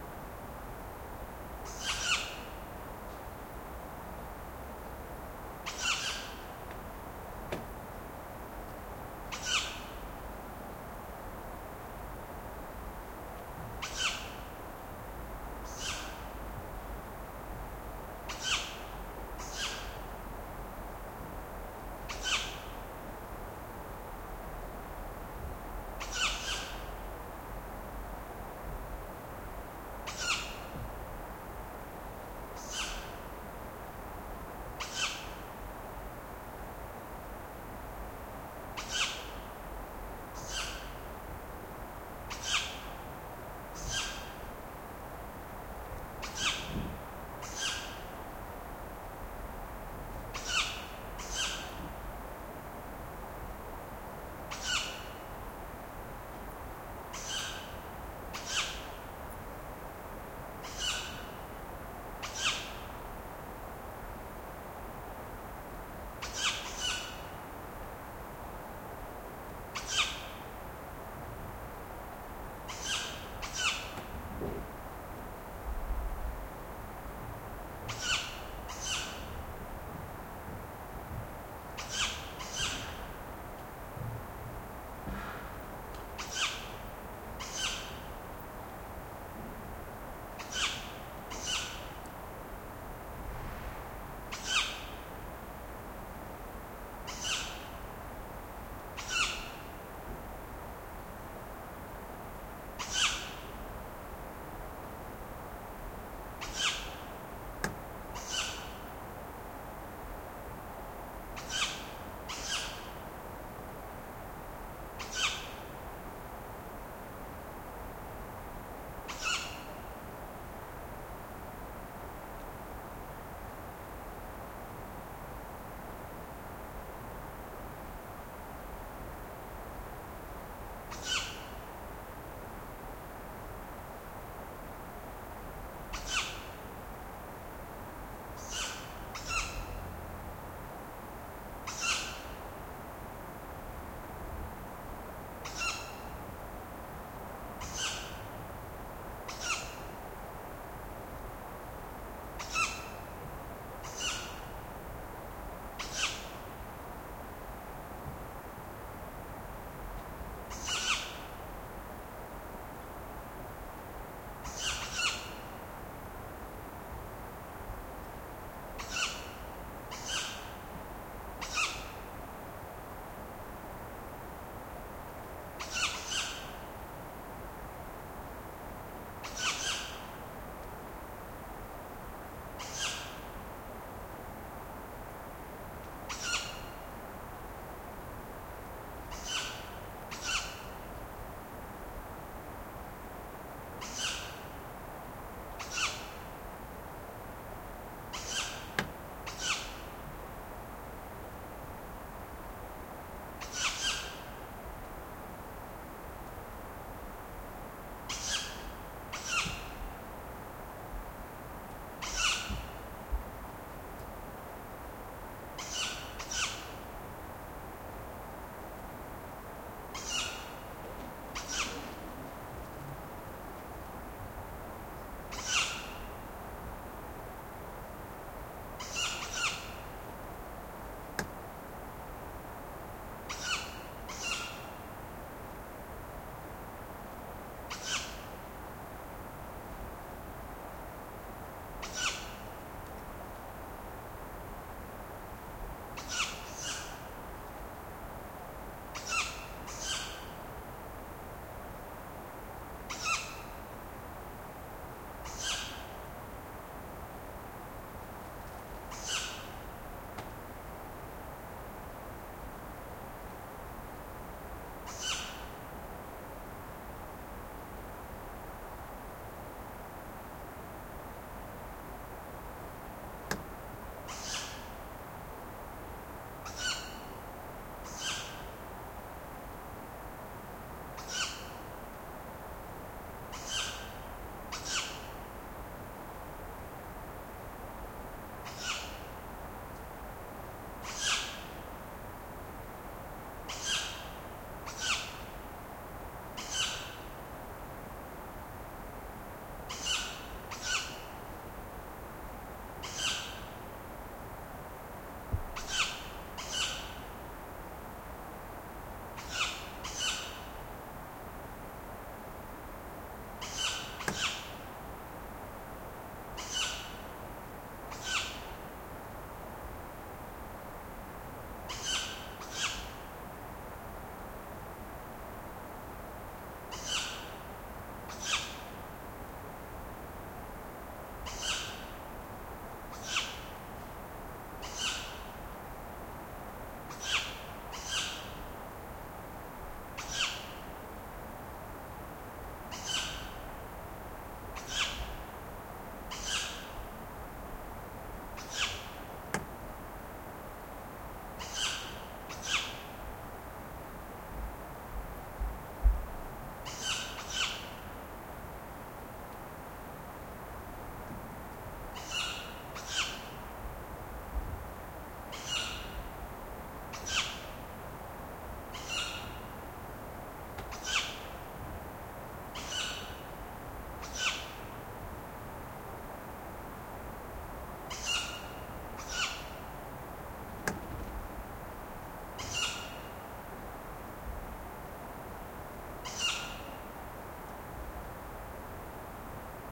A Tawny owl screeching for hours. Great if you want to sleep with the window open ; ). MKH40 microphones, Wendt X2 preamp into R-09HR recorder. Recorded in August 2010 in Perthshire / Scotland, with the wind of the forest and the sound of the River Tay in the background.
field-recording, night, owl, screeching